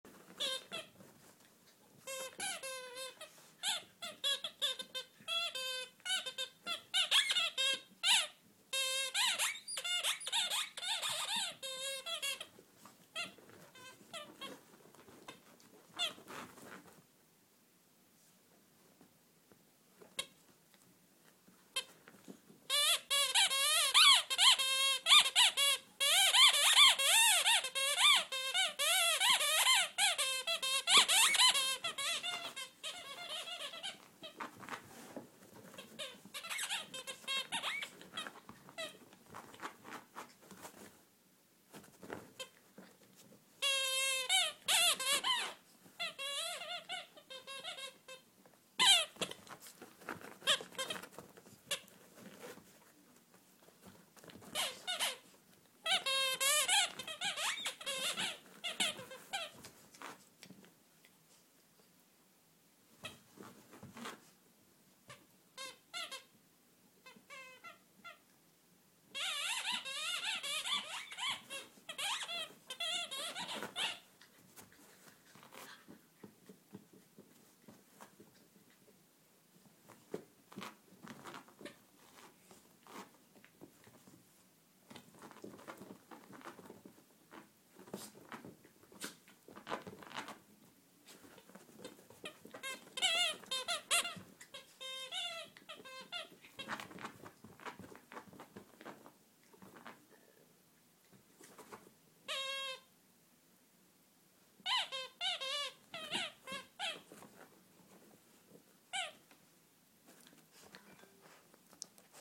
Our dog was playing with one of these squeaky toys.
puppy, dog, field-recording